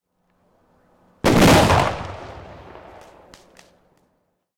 2nd explotion recorded for a conceptual artist, less reverb/ 2da explosion grabada para una artista conceptual, tiene menos reverb.
bomb, boom, detonation, explosive, explotion